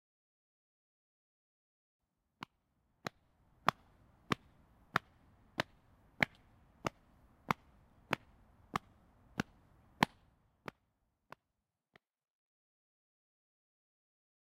Stomp - Street
Stomping on a street
CZ, Czech, Panska